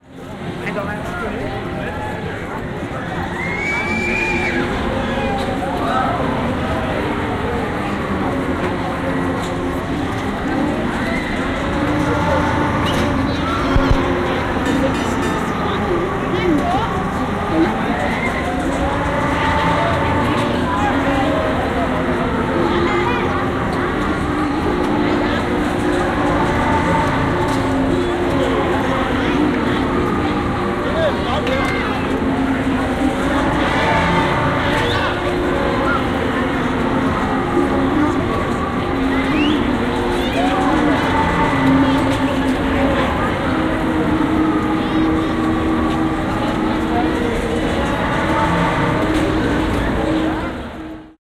09-Bakken HydraulicSwing
Bakken – the world’s oldest amusement park north of Copenhagen, Denmark.
Recordings 24. August 1990 made with Sennheiser binaural microphones on a Sony Walkman Prof cassette recorder near a hydraulically driven huge swing. You can hear people scream in the swing as well as hydraulic noise from the swing.
ambience, amusement, swing, scream, hydraulic, park